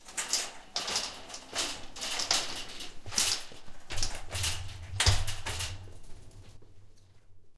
Shopping cart being pushed across wood floor in a medium sized room recorded in stereo using Sterling Audio ST51 Condenser mic in right channel and Peavey PVM 38i cardioid dynamic mic in left channel